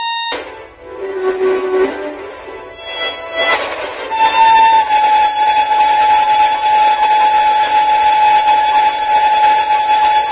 Neural Network Violin 6
Audio generated from training a neural network on violin sounds.
violin, abstract, neural-network